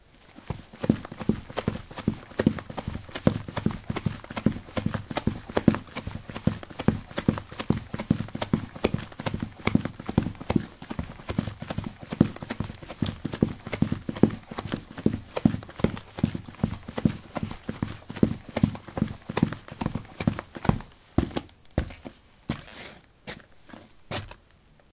soil 17c boots run
Running on soil: boots. Recorded with a ZOOM H2 in a basement of a house: a wooden container placed on a carpet filled with soil. Normalized with Audacity.
steps, running, run, footstep, footsteps, step